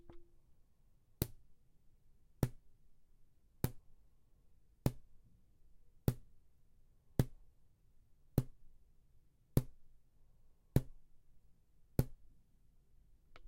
rubber band snap
holding a rubber band in one hand and snapping it with the other
band, rubber, snap